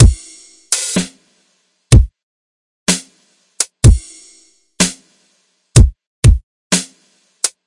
beat with snare 4 4 125bpm blobby type kick fizzy hats